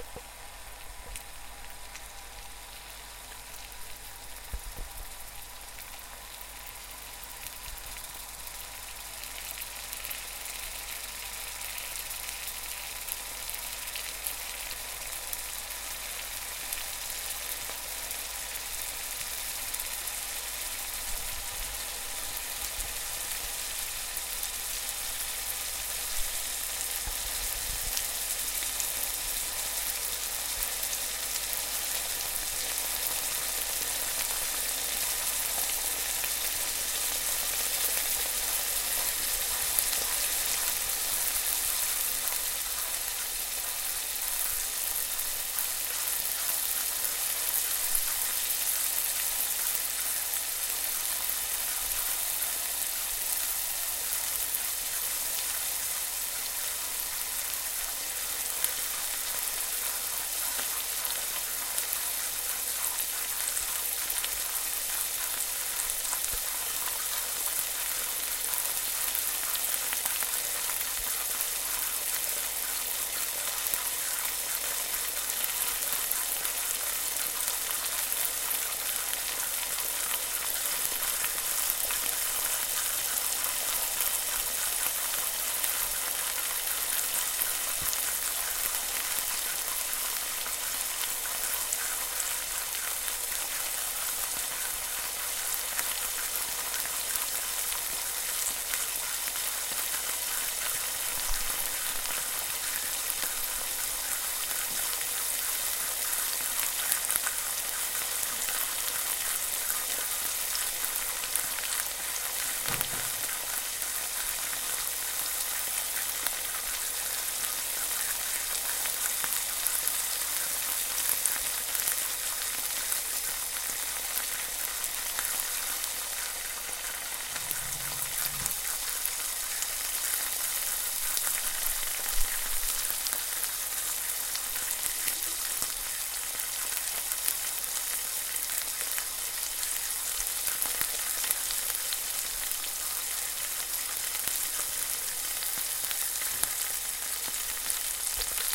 This is a recording of bacon being fried. I recorded this with a Zoom H4n, I have personally found this recording useful as a replacement with rain.